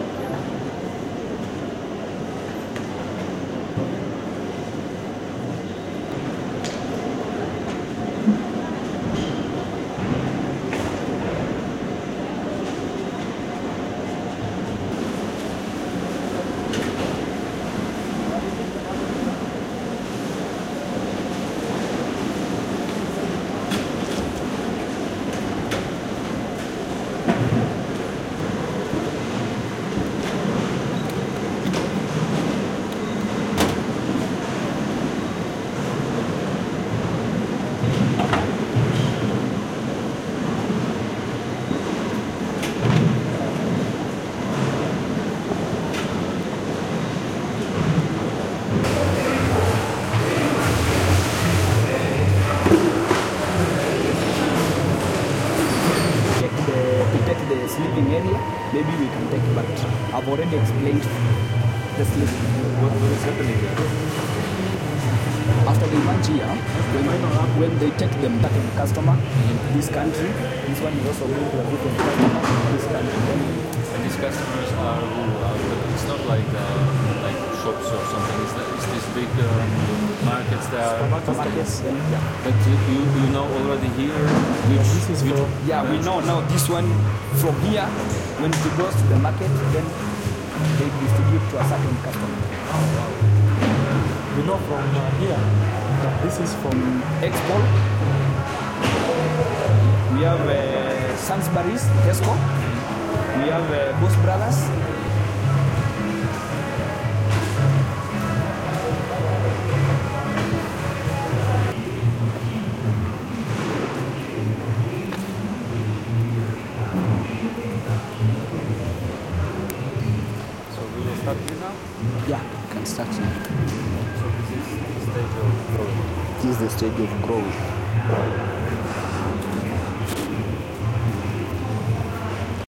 dec2016 Naiwasha Lake flower factory Kenya
Kenya, Naiwasha Lake flower factory, cutting room ambients